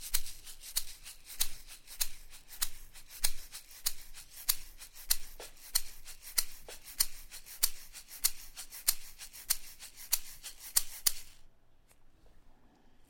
Egg Shaker - Closed Groove 2
Another groove done on an egg shaker with a closed hand.
2, Closed, Egg, Groove, Shaker